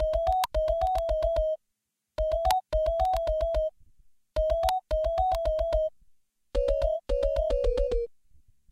8bit110bpm-40
The 8 Bit Gamer collection is a fun chip tune like collection of comptuer generated sound organized into loops
8, 8bit, bpm, com